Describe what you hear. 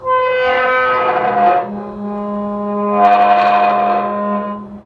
Heavy wrought-iron cemetery gate opening. Short sample of the shivery groaning sound of the hinges as the gate is moved. Field recording which has been processed (trimmed and normalized).